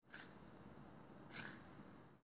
pasos desps de running cycle
3 pasos sincronisados con la animacion
gravity, improvise, wind